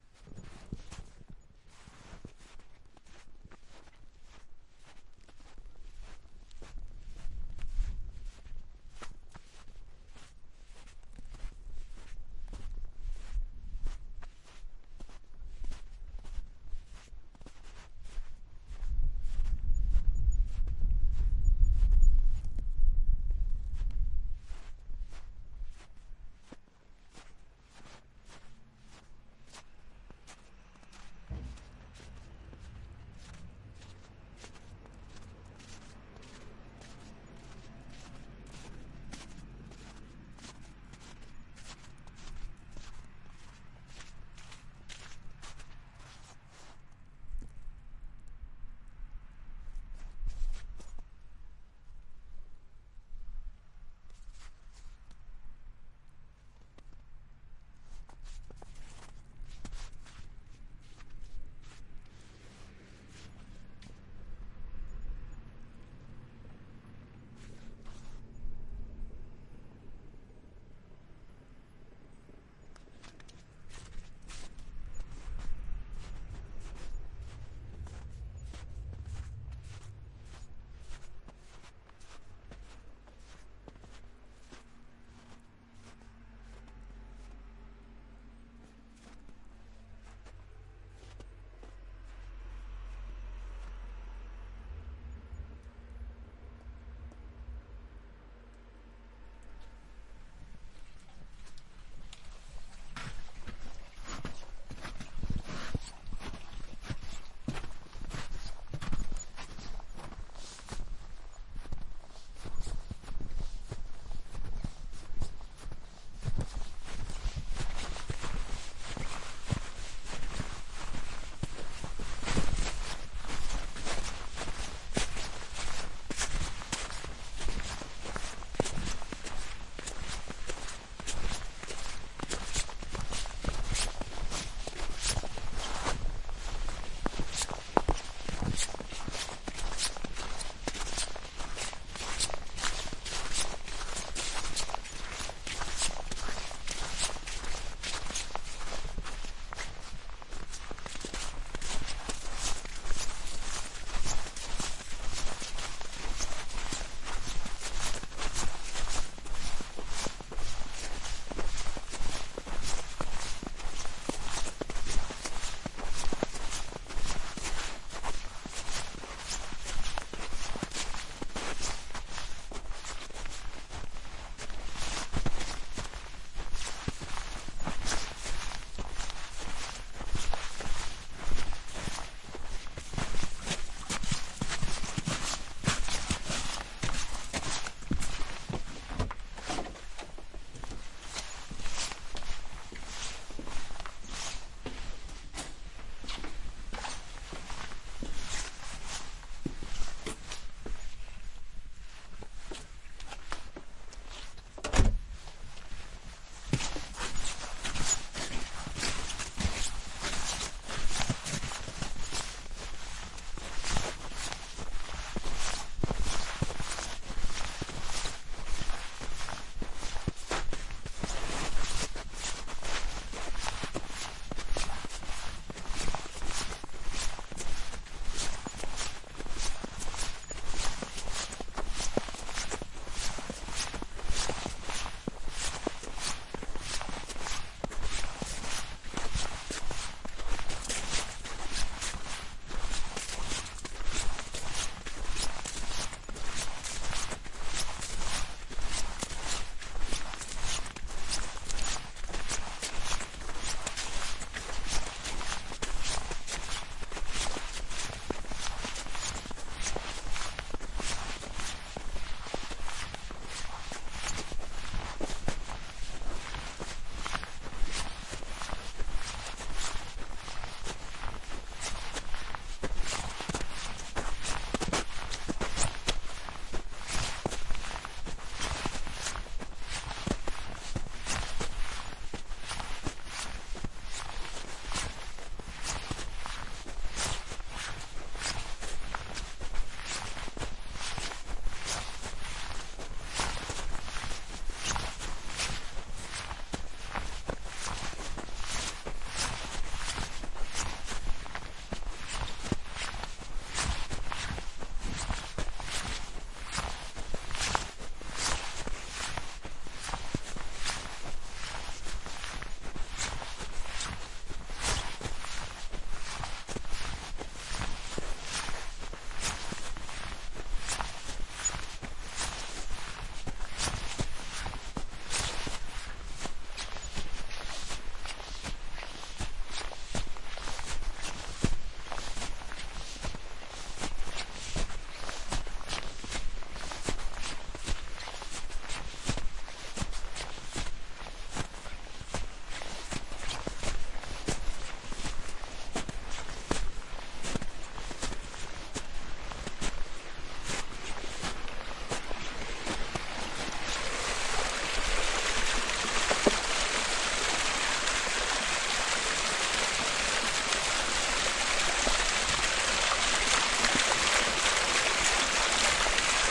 Walking in snow
Walking in wet snow on flat ground and uphill.
Recorded on a Zoom H6 with XY mic (electret) and fake fur in front of, then behind feet, downwards from hip level.
feet, footsteps, snow, steps, walk, walking